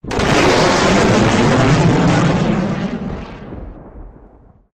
Missile firing fl
New Missile firing sound for the Shatter Worlds freelancer mod.
Made using a sonic boom and thunder